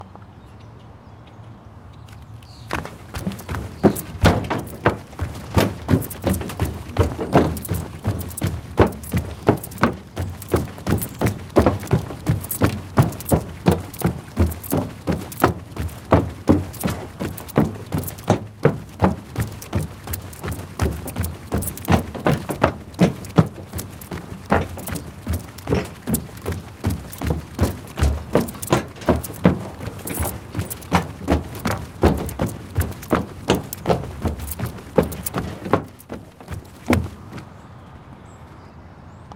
Running On Boardwalk
recorded on a Sony PCM D50
xy pattern